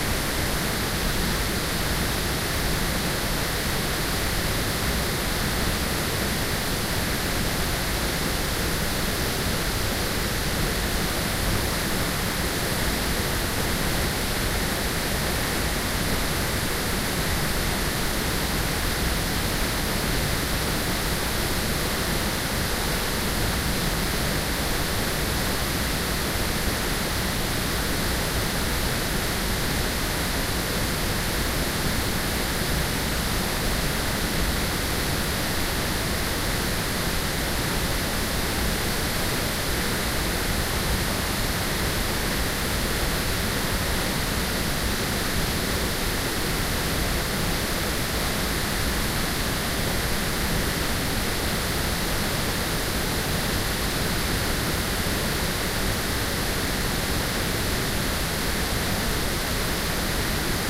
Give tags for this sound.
water; mena-falls; binaural; paronella-park; mena-creek; rushing; noise; field-recording; stream; river; water-fall

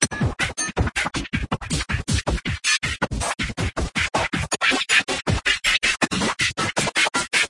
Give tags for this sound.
drumloops; rythms; glitch; processed; experimental; sliced; idm; extreme; drums; hardcore; electro; acid; electronica; breakbeat

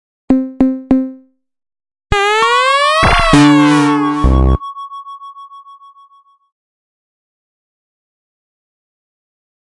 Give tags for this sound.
120BPM; ConstructionKit; dance; electro; electronic; loop; rhythmic; sci-fi; weird

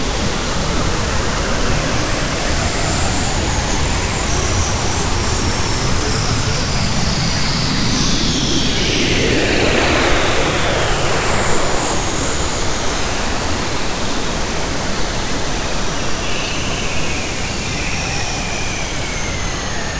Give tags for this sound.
science-fiction; audiopaint; alien; machine; galaxy; space; artificial; ambience; sci-fi; background; noise; spaceship

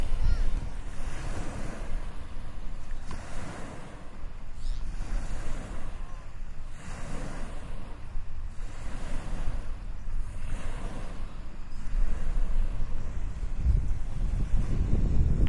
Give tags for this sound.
lake; water

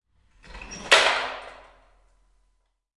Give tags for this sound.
basement; chair; church; dropping; echo; falling; floor; metal; plastic; room; smack; stone; thrown

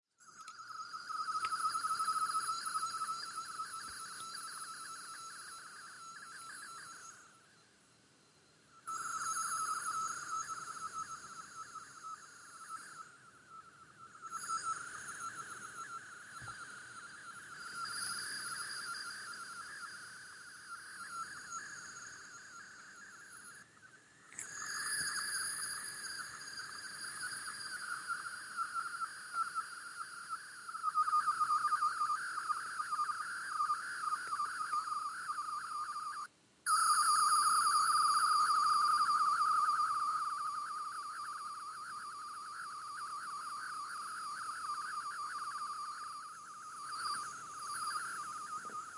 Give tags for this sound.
air; breathe; digital; glitch; harsh; lo-fi; natural; noise; selfmade; thermos; water

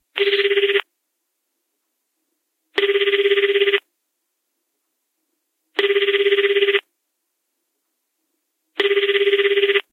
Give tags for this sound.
calling; call; mobile; telephone; Japan; Japanese; ring; phone; push

